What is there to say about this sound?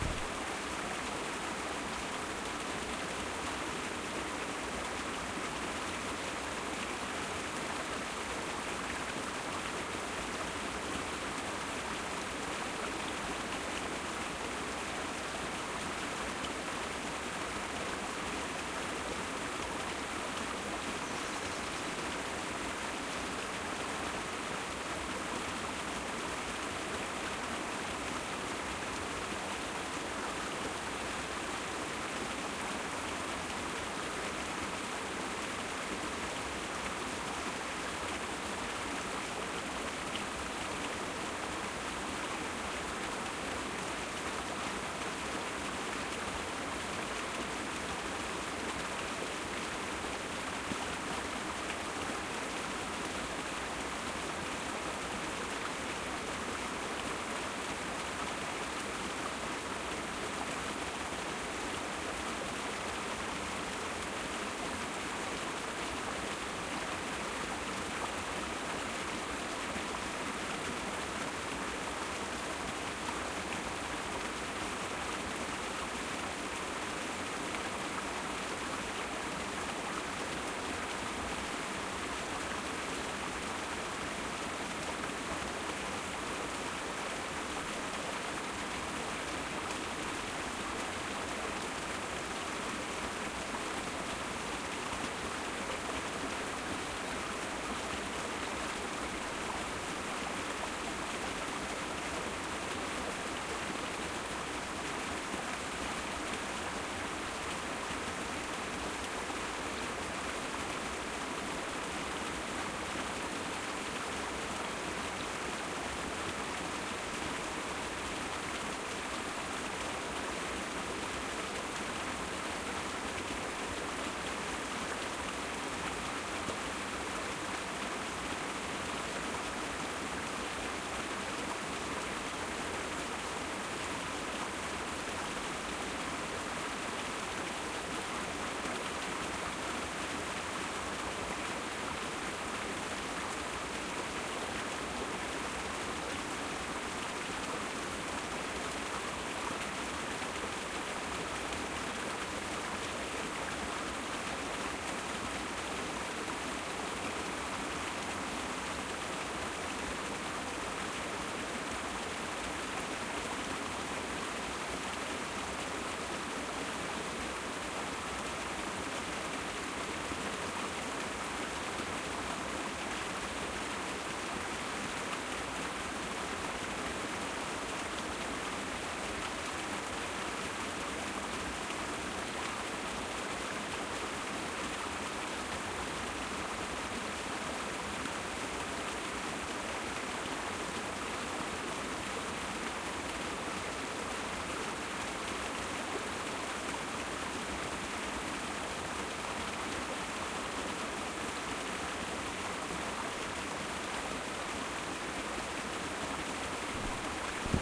Stream Waterfall Wales
This is a recording of small waterfall, about 3ft drop, in a stream near Rhos, Wales. We had had heavy rain overnight so it was in full flow. To me it also sounds like heavy rain falling. Recorded on Zoom H4N Pro. There is some handling noise at the beginning and end.